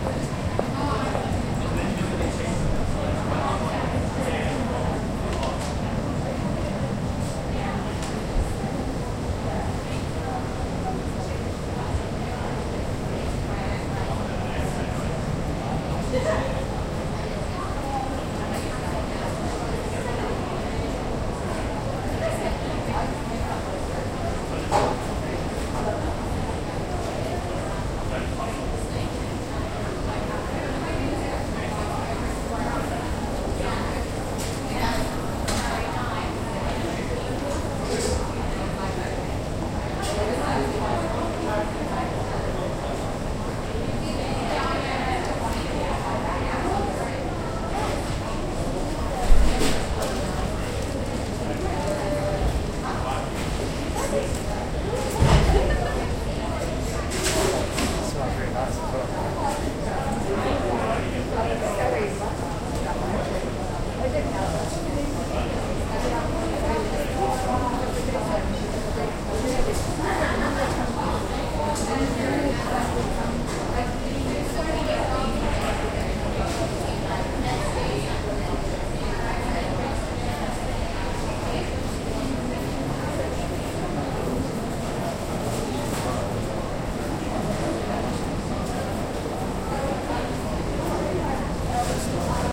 Oxford covered market ambience
A short extract from a longer recording made in Oxford's covered market on 6th May 2014. Passers-by talking, items being moved about, coins.
field, market, oxford, shops